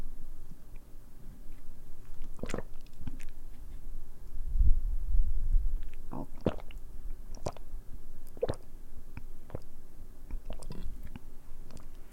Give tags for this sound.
beverage; drink; drinking; gulp; gulping; sipping; swallow; water

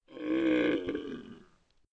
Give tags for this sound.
monster; death; creature; evil

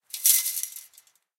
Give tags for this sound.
chain; fence; metal; rattle

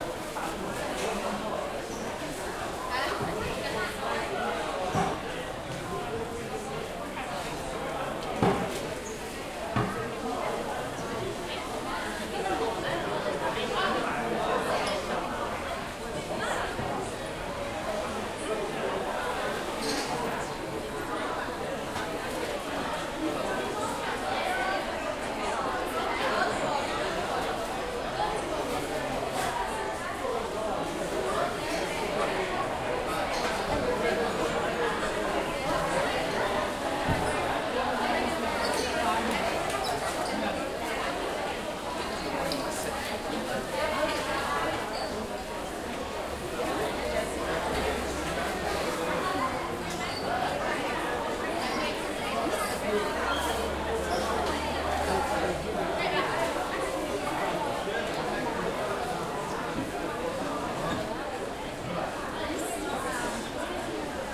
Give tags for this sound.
china; indoors-marketplace; marketplace; market; beijing

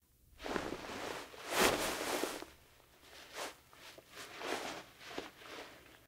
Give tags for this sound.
Cloth; Flapping; Foley; Jacket; Movement; Shacking

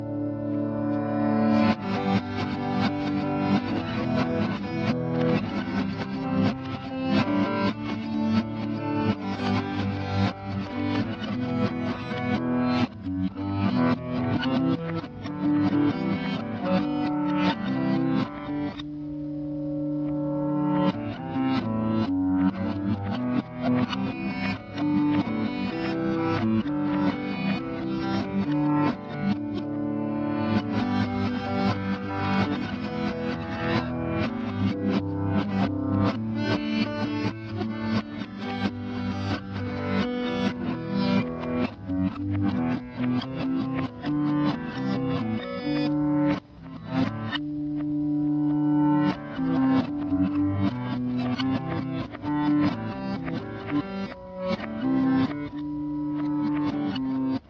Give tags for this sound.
strummed; creationary; guitar; acoustic; chord

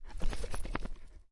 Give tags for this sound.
animal; canine; Dog; effect; foley; shaking

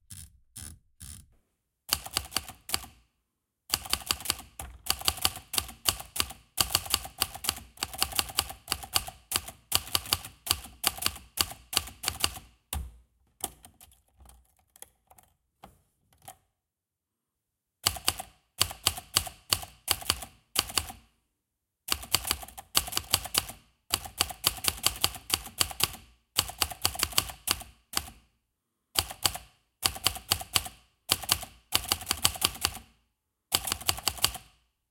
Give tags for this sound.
Typewriter; Machine; Text; Writing

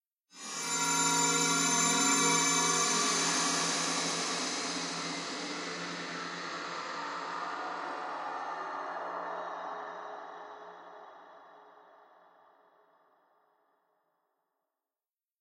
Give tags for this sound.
casting; long; unreal; magic; fantasy; dissipation; spell; magical; cast; game

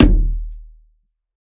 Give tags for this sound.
percussion; foley; kick; bassdrum

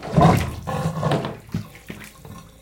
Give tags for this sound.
plumbing; plunger

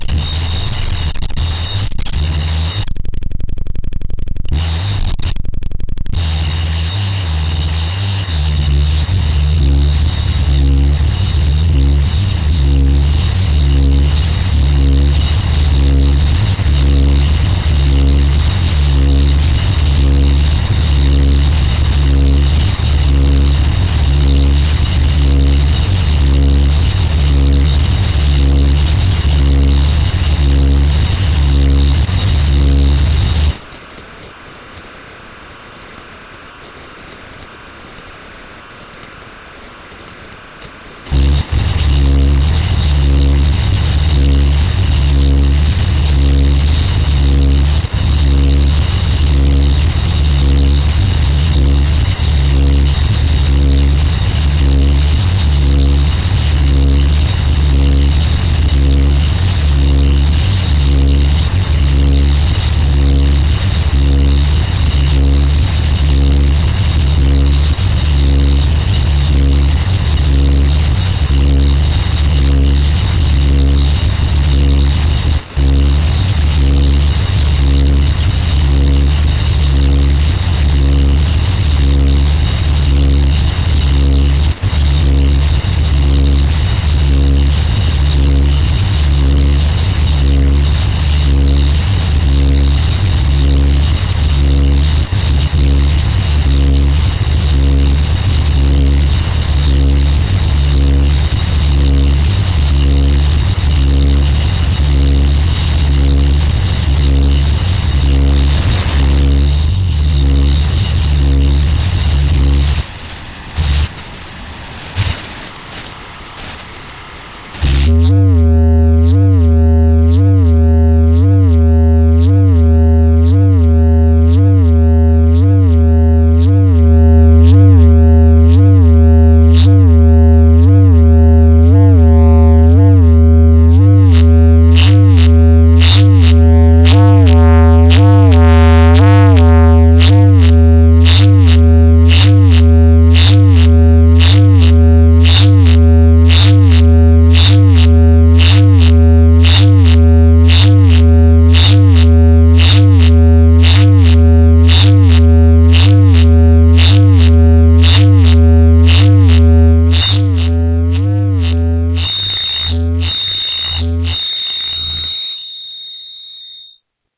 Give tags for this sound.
analog; feedback-loop; wave

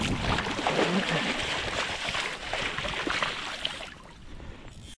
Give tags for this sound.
field-recording; fish-being-netted; fish-river; Fish-splash; splash; water-splash

